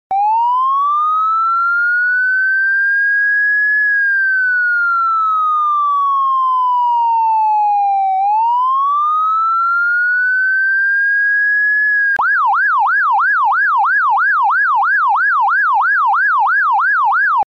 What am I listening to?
A combination of a wailing siren and yelp. Made with the help of: Windows Live Movie Maker, and Whelen.